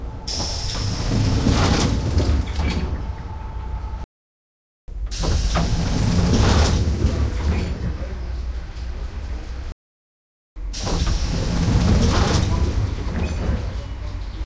Slidingdoor open 3 times
Pneumatic slidingdoor opens in a dutch train 3 times.
door, open, slidingdoor, train